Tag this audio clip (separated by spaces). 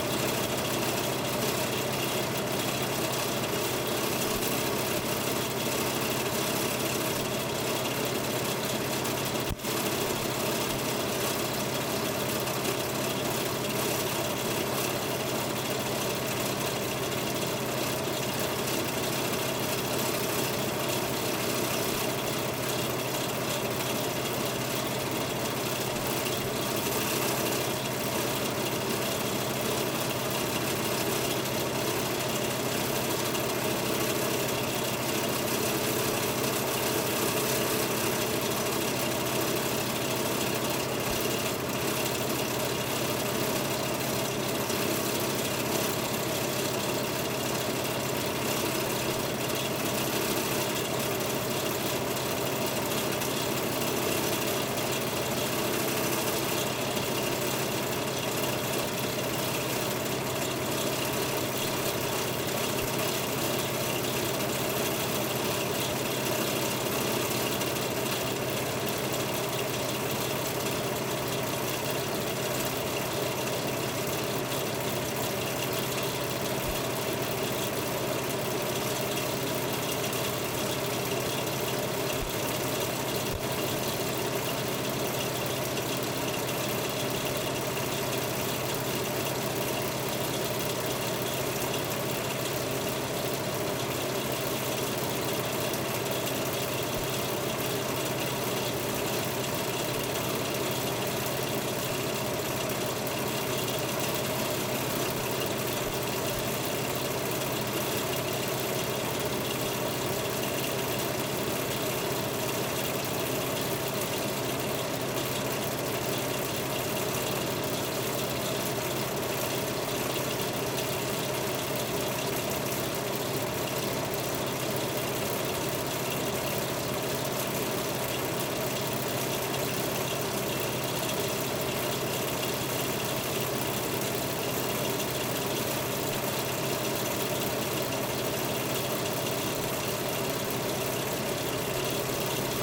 broken heater metal motor rattly